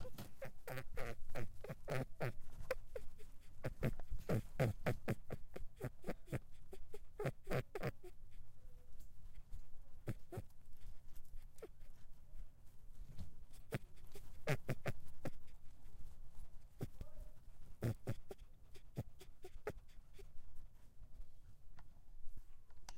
Female rabbit
Tascam DR-07MKII
animal, bunny, growl, growling, pet, rabbit